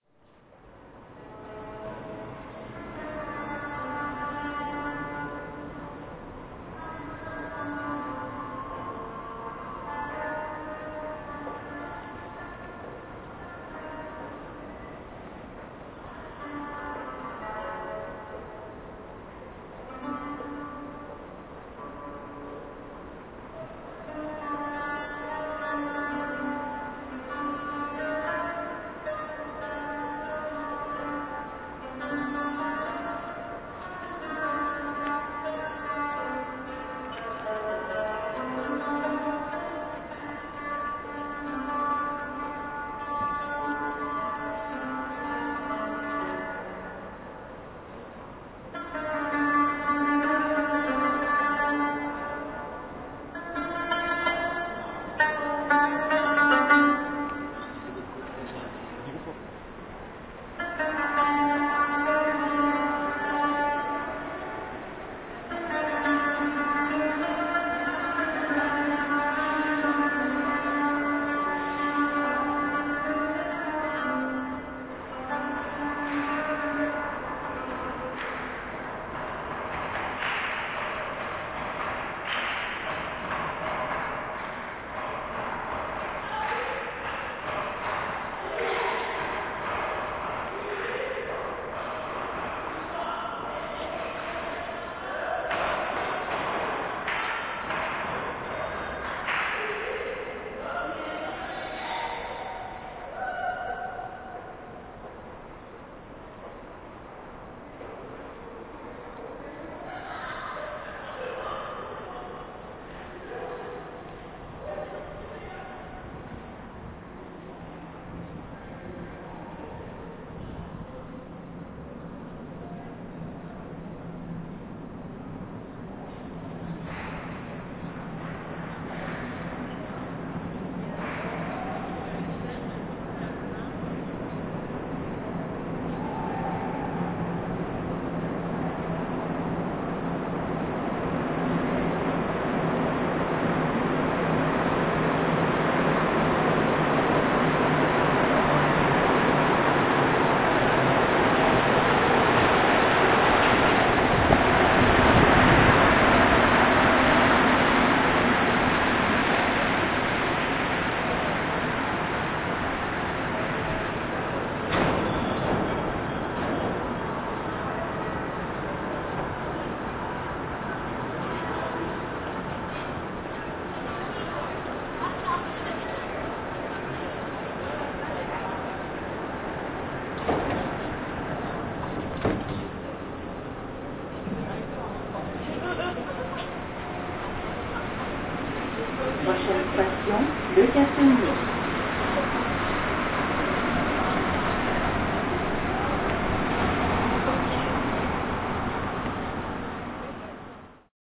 Opportunistic recording of Strings and chants in the Montreal Metro. A busker called to me from cathedral like chambers in the Jean Talon Metro station in Montreal, on my way to a live show. Some exuberant young people coming down the escalator broke into the moment with a team chant, very much like i recorded years ago in the NY city time square subway station...
station, scape, chanting, team, metro, n800, live, busker, kids, instrument, subway, train, indian, urban, montreal, sound, spirit